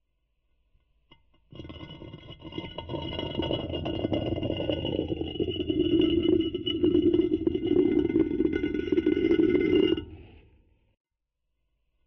stone-sample (see the stone_on_stone sample pack) played through a FOF-synthesis patch in Max/MSp, using IRCAM vowel-resonator parameters, thus saying A-U